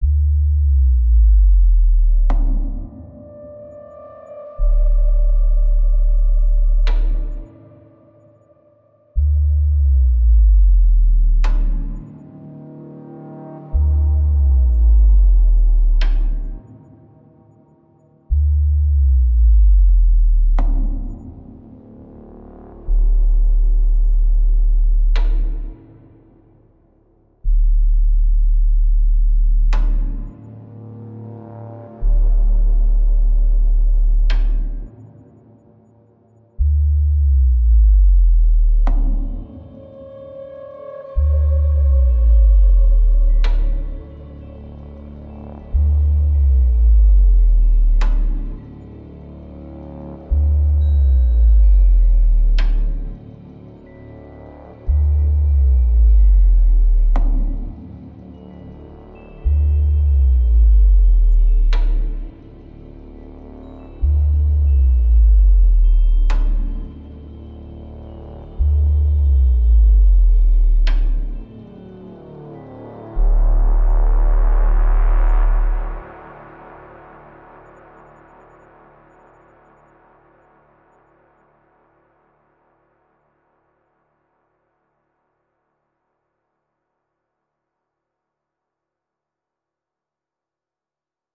knights riders
background mode for scenes with horrific story
beast, horrific, roar, spooky, scary, evil, drama, monster, creature, horror, story, fear, terror, dead, haunted, growl, zombie, ghost, group, dark, intro, creepy, eerie